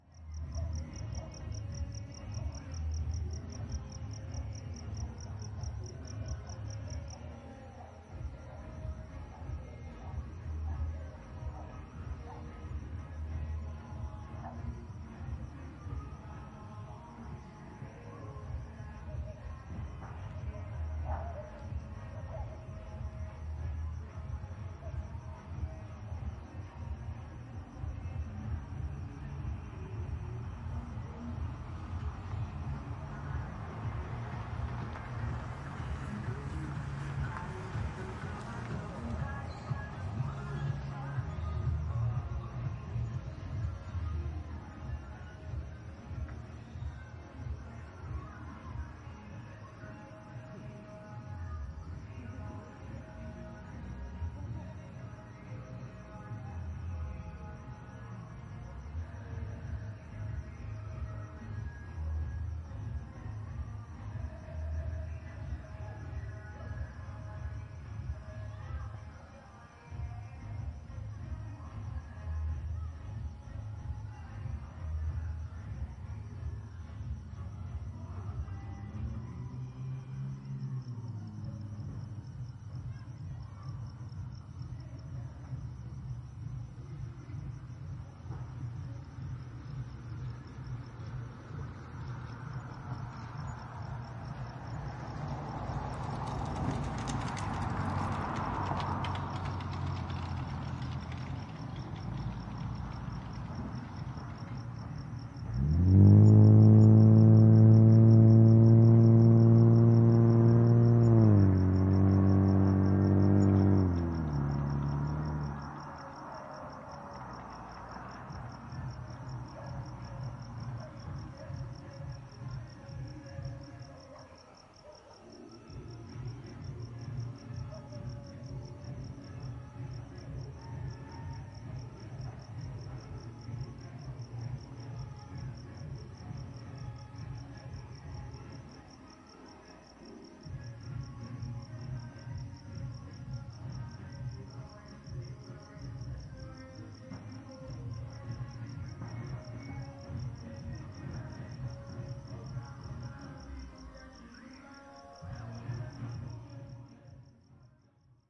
Night Noise Lajamanu Verandah
The title says it! Music, cars, people partying etc.
An MS stereo recording done with a sennheiser MKH416 paired with a MKH 30 into a Zoom H4n